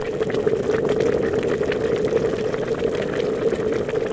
Boiling water in the kitchen. It's hot!
boiling, boiling-water, coffee, steam, boil, appliances, hot, water, brew, tea, kitchen, brewing